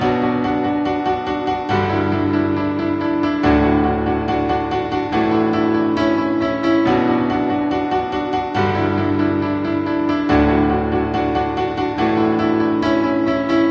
Piano 8 bar 140bpm *3
a quick piano loop at 140 bpm that could try wriggle into a mix
fast, loop, piano